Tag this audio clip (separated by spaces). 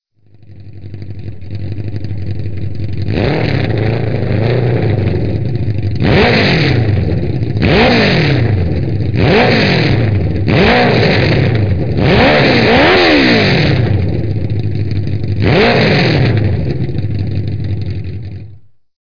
engine mono motor six-sounds-project